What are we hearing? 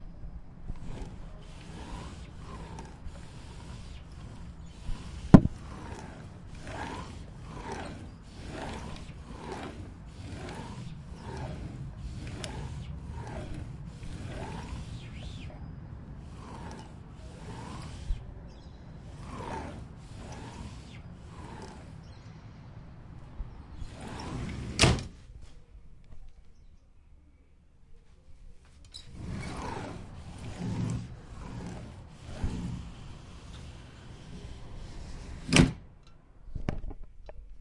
Sonic Snaps AMSP Nayeli Coraima
Field recordings from Ausiàs March school students.
ausiasmarch, cityrings, sonicsnap, spain